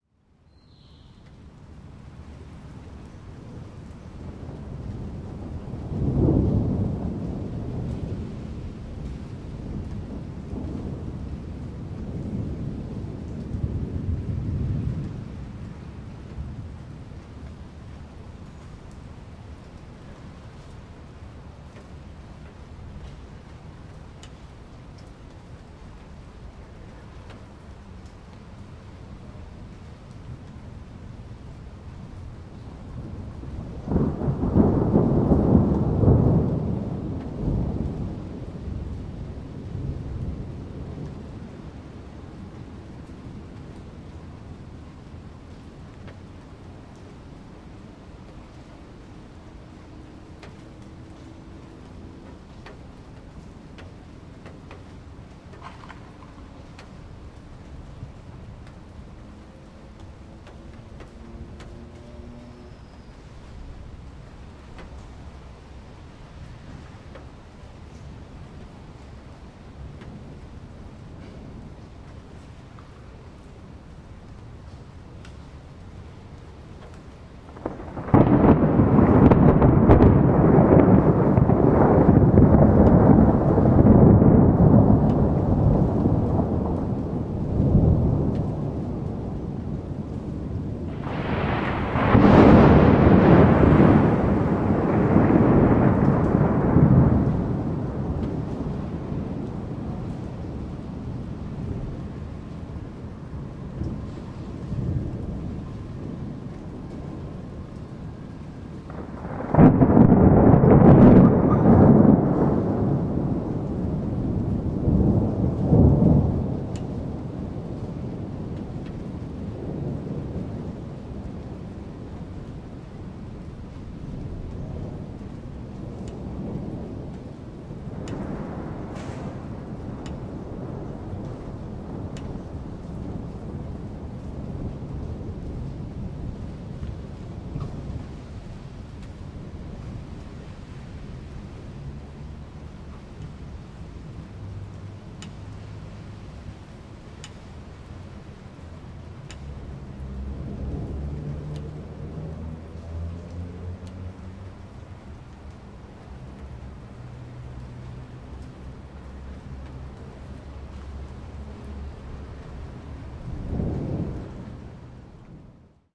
thunder before a storm
nature, thunder, exterior